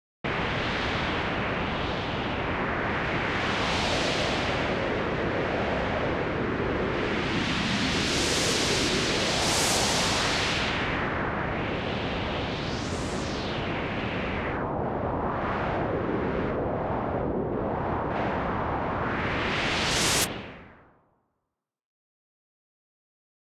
Wind is pretty easy to make on a synth if noise is routable to the filters. Just white noise to some filters, cutoff being modulated by lfos, and hand modulation of the filter cutoff and resonance/q. Very stereo.